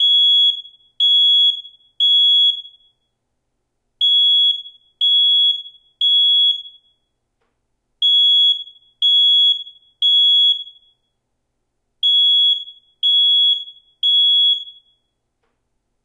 Smoke detector alarm, close perspective
Smoke alarm close perspective
alarm, alert, beep, chirp, detector, smoke